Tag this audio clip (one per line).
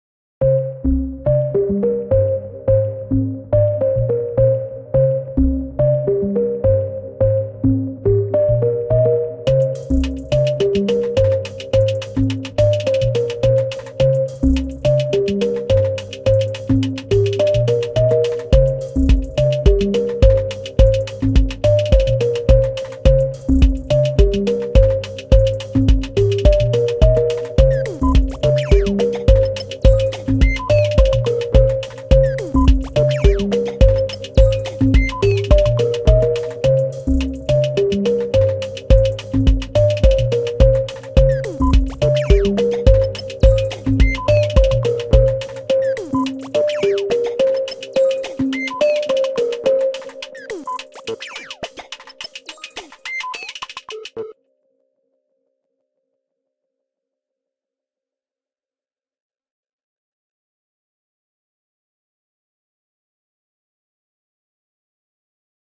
intros
blip
bleep
game
melody
intro
music